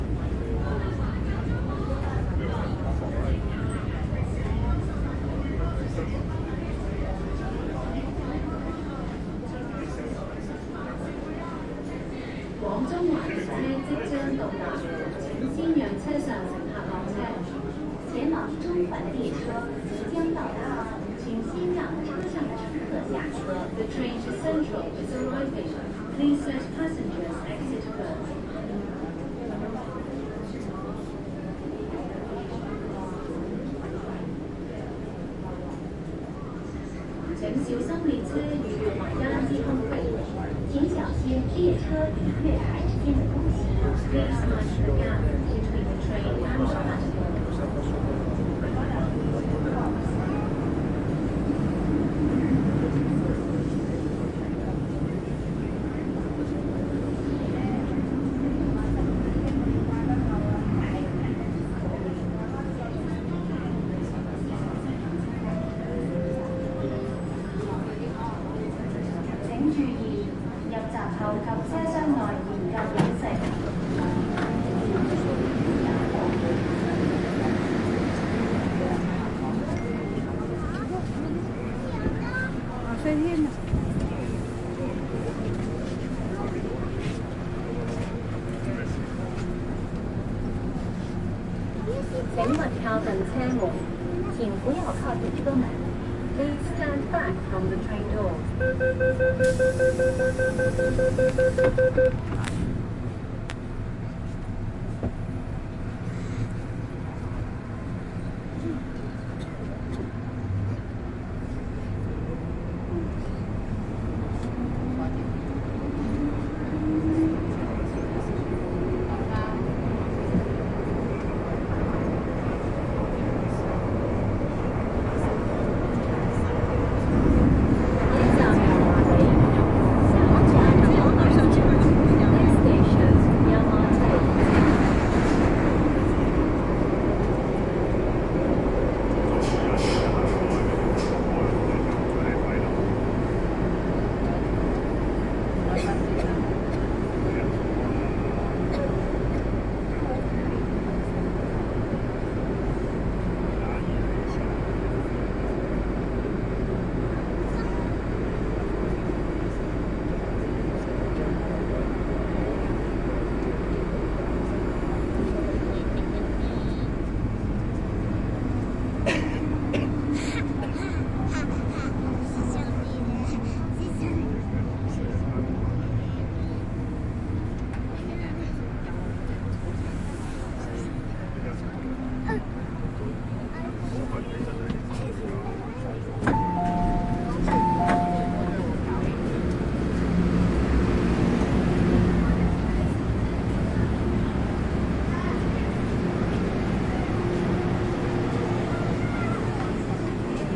Ride MTR (train) through Hong-Kong city.
Here, I was travelling in MTR through Hong-Kong, and you can hear some typical sounds from this kind of transportation, like announcements, various sounds from the train-station and from the train, people talking, various noises, etc…
Recorded in October 2016, with an Olympus LS-3 (internal microphones, TRESMIC ON).
High-pass filter 160Hz -6dB/oct applied in Audacity.